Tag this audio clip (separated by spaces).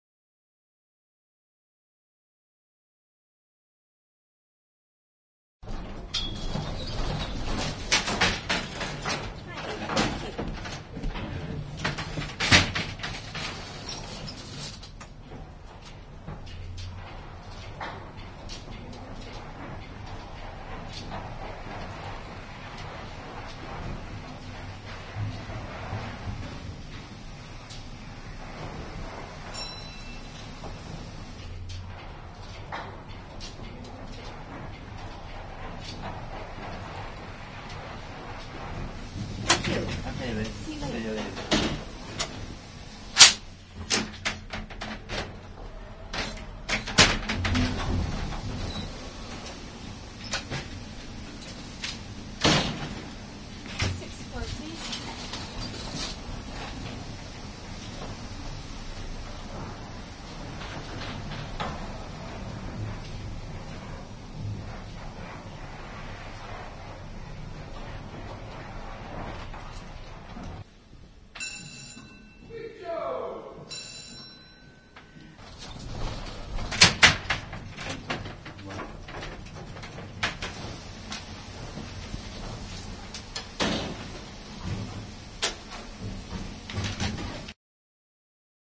chicago manual-elevator field-recording elevator